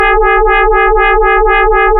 Generated from an FM-based software sound generator I wrote. Great for use with a sample player or in looping software.